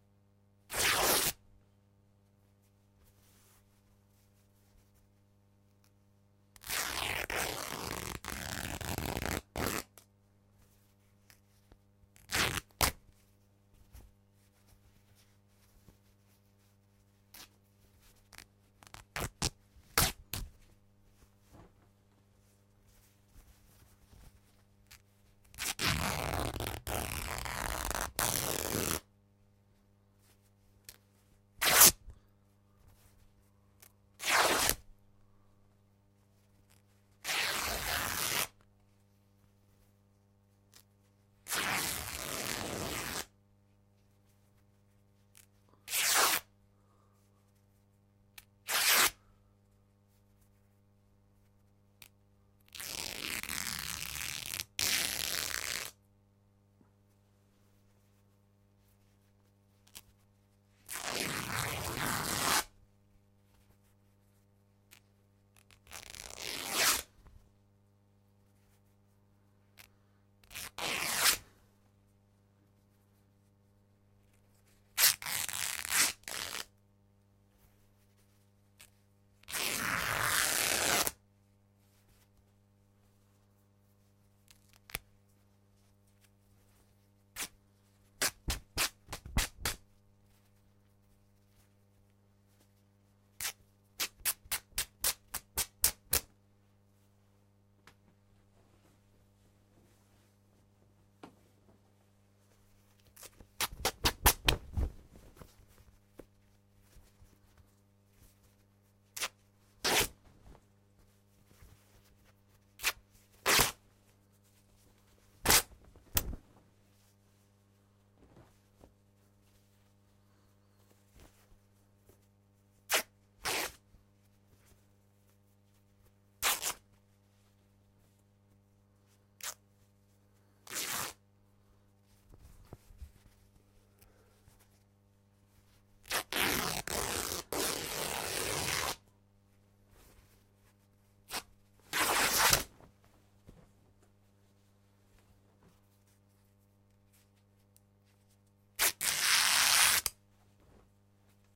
Tearing Corduroy Shirt
My favourite purple cord shirt wore out so I wanted to give it a proper send off! Long and short rips, various sounds until it became unrecognisable
short, clothes, ripping, cloth, fabric